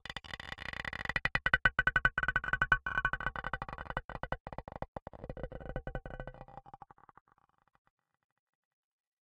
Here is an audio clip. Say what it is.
Electro stone 1
sounddesign, Noise, Futuristic-Machines, sound, Stone, strange, Mechanical, effect, Electronic, Spacecraft, design, freaky, loop, sfx, lo-fi, sci-fi, digital, abstract, peb, sound-design, Alien, fx, UFO, Futuristic, soundeffect, future, weird, electric, Space